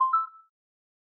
Beep 03 Positive
A user interface beep that denotes a successful action.
beep, user-interface, tone